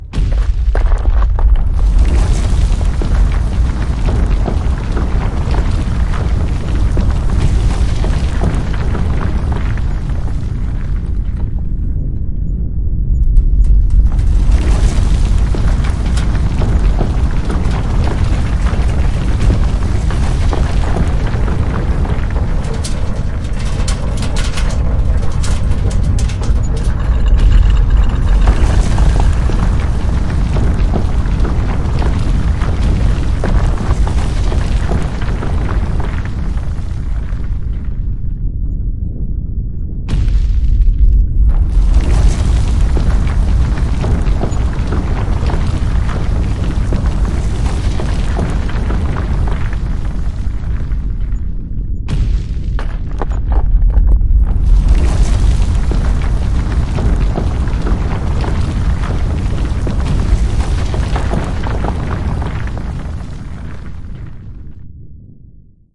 An earthquake sound. Enjoy.